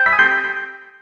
I made these sounds in the freeware midi composing studio nanostudio you should try nanostudio and i used ocenaudio for additional editing also freeware
application, bleep, blip, bootup, click, clicks, desktop, event, game, intro, intros, sfx, sound, startup